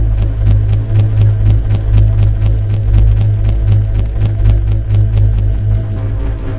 background is a sustained ambient pad rising and falling pitch;
foreground is a thin panning flicker; designed with Native Instruments Reaktor and Adobe Audition
2-bar, ambient, electronic, industrial, loop, noise, pad, panning, sound-design, sustained